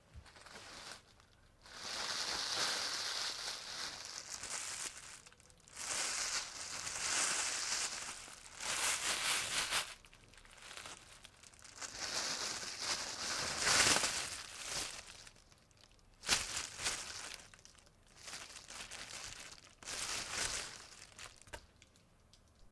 Plastic bag crinkling take 2: The sound of someone compressing and pulling on a plastic grocery bag, noisy sounds. This sound consists of several one shot variations all within one take. This sound was recorded with a ZOOM H6 recorder and a RODE NTG-2 shotgun mic. No post-processing was added to the sound. This sound was recorded by someone pulling and compressing a normal plastic grocery bag in a small room, while being recorded with a shotgun mic.